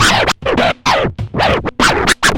Recorded in cAVe studio Plzen 2007.
you can support me by sending me some money:
vinyl, turntablism, scratching, rhythm, 100-bpm, record, loopable, scratch